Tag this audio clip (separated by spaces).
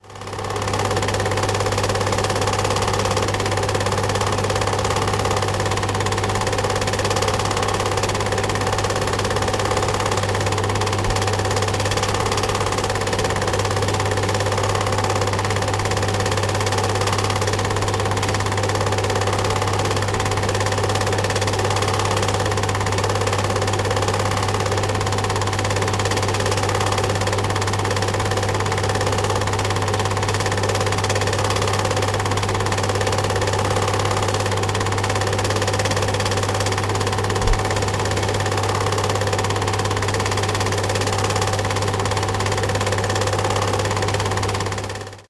8mm cine eumig film projector sound super8